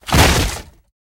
Kicking/Forcing/Breaking Wooden Door
The sound of kicking in a door.
force, violence, entrance, forcing, break, west, kick, wild, awesome, thief, kicking, violent, breaking, thug, gate, door